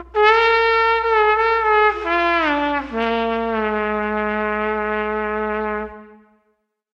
A jazz lick played on a slide trumpet. Recorded using a dynamic microphone. Added reverb and delayed right channel from left to add "stereo effect"
slide-trumpet, soprano-trombone, jazz